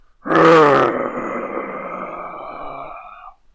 female growl1
Zombie-like, drawn out roar by a female. Recorded and performed by myself.